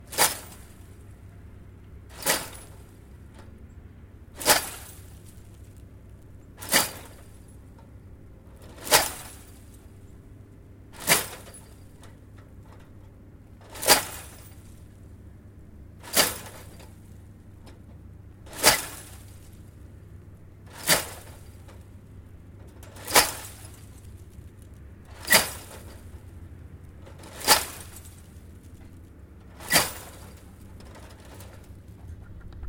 fence Yank
Alternating tug and push on a metal fence, a section about 5x5ft.
chains, chain-link, metal-fence, metal